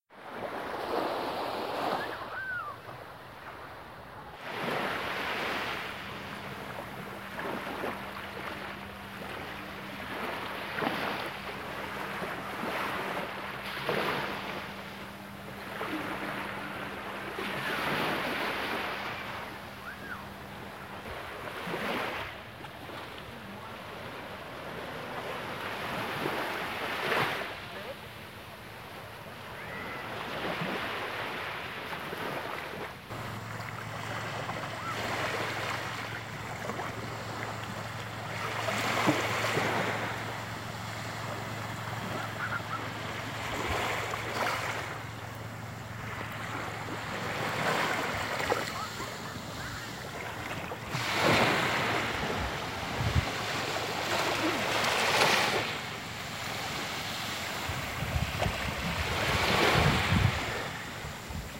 Here's what I did a few months ago when I went to the beach. Using a simple voice recorder in my phone, I recorded the sound of the waves splashing. The audio were actually 5 long separate clips. I later merged them all using Audacity.